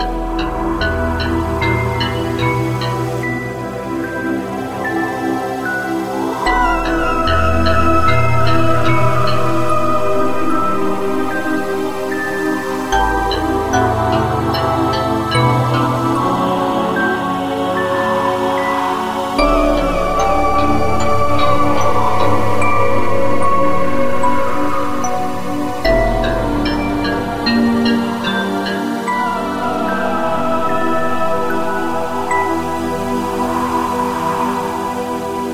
Mystery Loop #3
Mystery loop made in FL Studio.
2022.